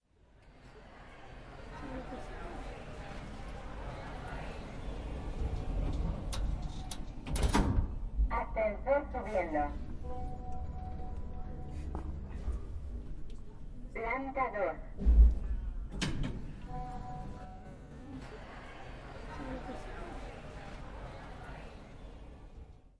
Lift of an university. First he says "lift up" and then "2nd floor". The sound of doors opening and closing. We can hear the distant voices. Sound environment.
I used ZOOM H4 HANDY RECORDER with built-in microphones.
I modified the original sound and added equalized and compression .
I changed the input and output sound progressively decreasing.
All with Adobe Audition 2014.
university, opening, doors, lift, elevator, closing